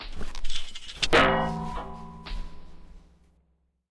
character footsteps Mixdown 1
Armour, character, footstep